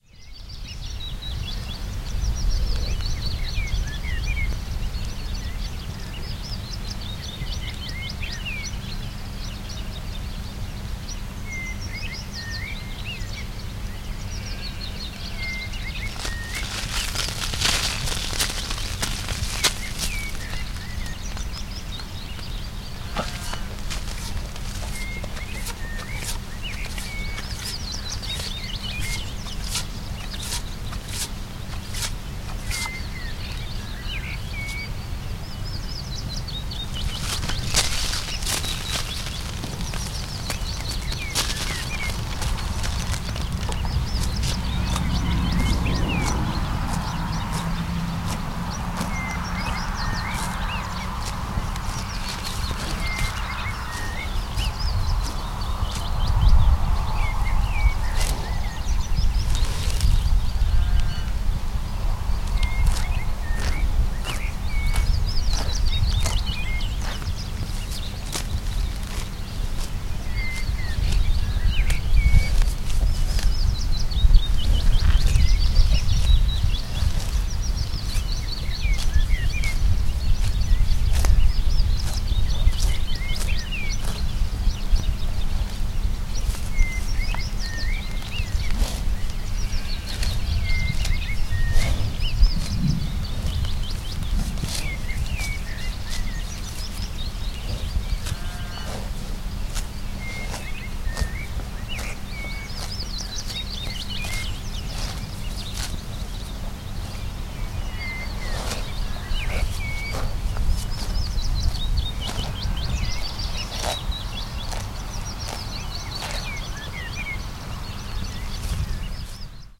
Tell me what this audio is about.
Recording of a highland cow walking and munching on a grass, birds and sheep in the background.